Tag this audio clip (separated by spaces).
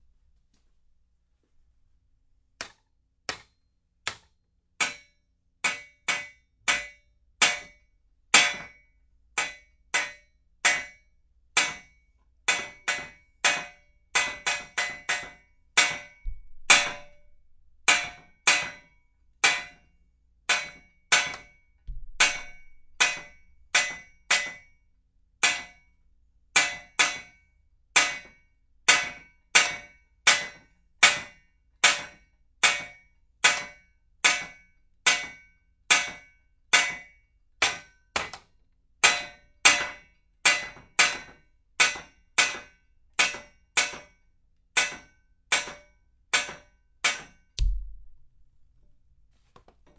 hammer metal rod pipe donk steel iron metallic ting clang